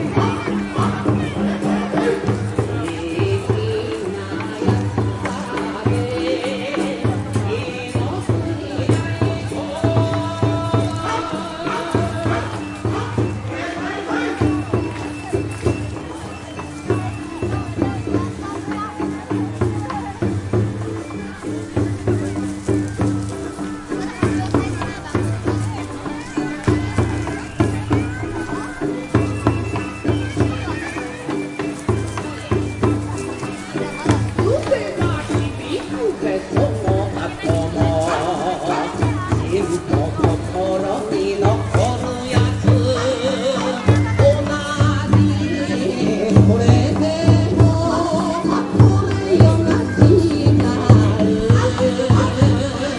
Summer festival Bon-Odori at Ikegami Honmonji buddhist temple at Ikegami, Oomori, Tokyo, Japan. Sounds of Japanese vocal dance music with live taiko drums over it, people talking and walking on gravel, pebble type surface. Recorded on 5th of August 2015, Olympus DS-750, no editing
bon-odori, buddhist-temple, Ikegami-Honmonji, Oomori, summer-matsuri, Tokyo-Japan